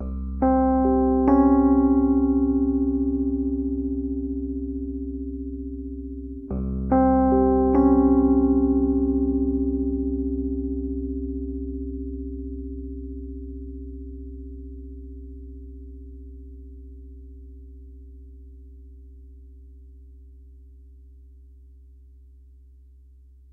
rhodes mystery bed 2
Arpeggio chord played on a 1977 Rhodes MK1 recorded direct into Focusrite interface. Has a bit of a 1970's mystery vibe to it.
chord, electroacoustic, keyboard, rhodes